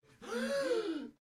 a person breathing in rapidly, shock-reaction